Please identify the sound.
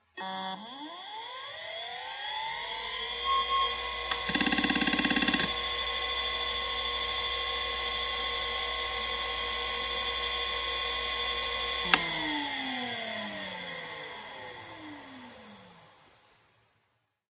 Conner CFS420a - 3600rpm - BB
A Conner hard drive manufactured in 1993 close up; spin up, seek test, spin down. (cfs420a)